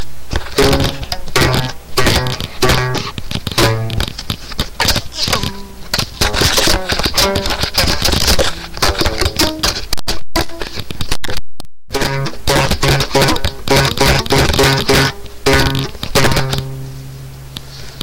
stretching a rubber band on a plastic box
I was playing with a rubber band I stretched out on a plastic box for pencils and things, stretching it back and forth seeing what happened
band, box, plastic, rubber, stretch